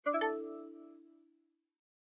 Vintage Alert Notification 2 6
Synthetized using a vintage Yamaha PSR-36 keyboard.
Processed in DAW with various effects and sound design techniques.
Alert, Digital, Error, High, Low, Off, PSR36, Reward, Sound, Synth